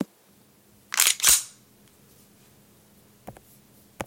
Sig Sauer P229 Handgun slide rack
Racking the slide back on a Sig Sauer P229 pistol.
rounds, round, magazine